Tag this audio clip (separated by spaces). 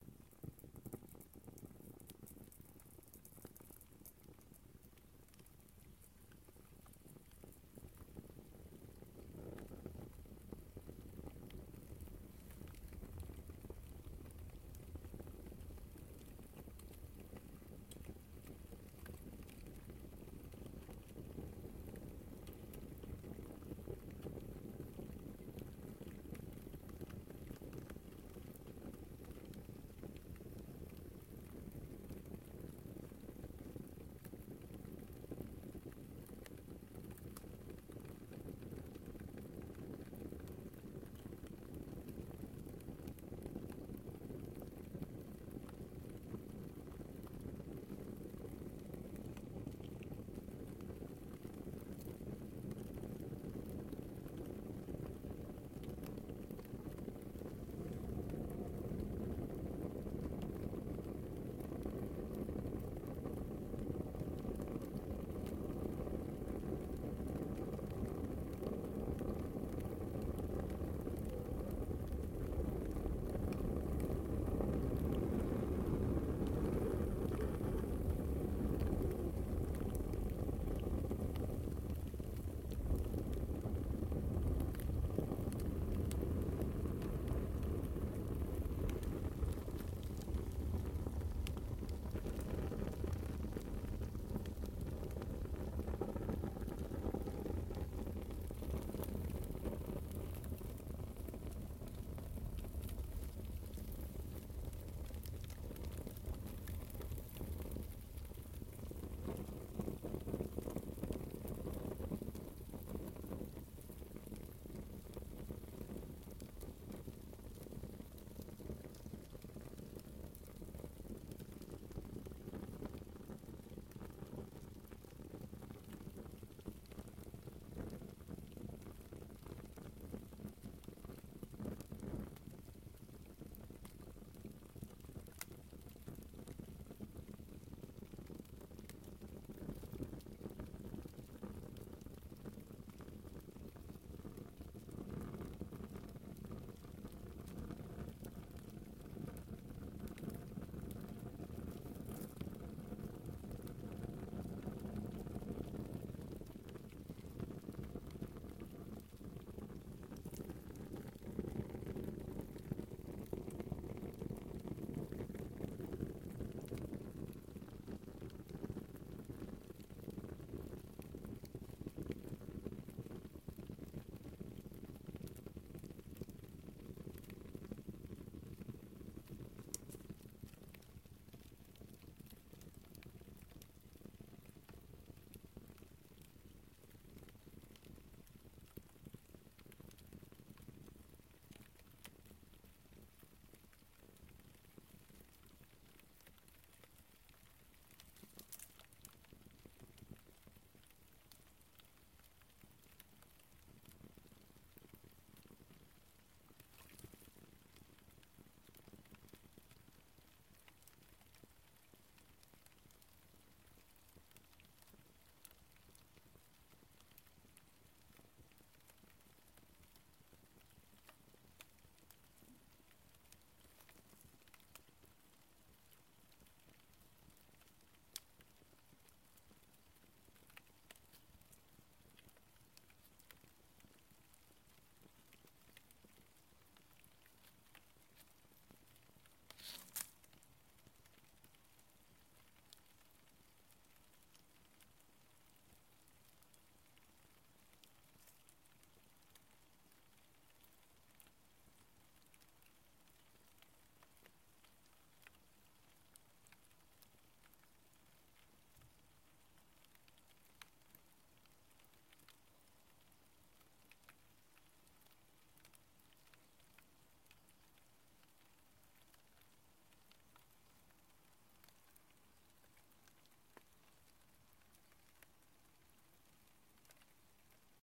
crackling fireplace crackle flame burning sparks fire chimney